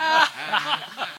recording of two males laughing silly
funny, human, ha, hahaha, haha, people, voice, happy, laughter, laugh